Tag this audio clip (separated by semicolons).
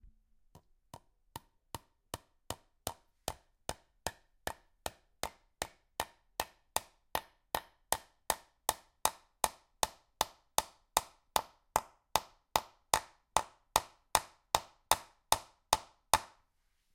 Czech; hammer-with-nail; Panska; CZ